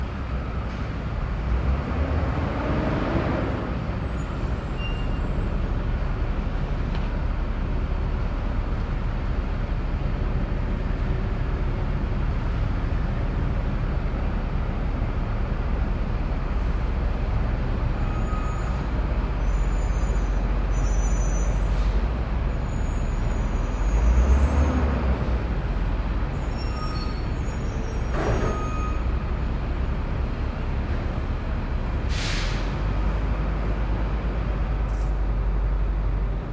A different bus or truck coming to a stop with squeaky brakes.
ambient, brake, bus, city, cityscape, squeak, stop